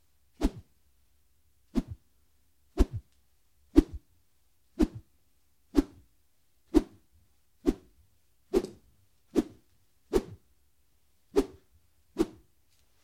Centimeter diameter wooden dowel whistle through air.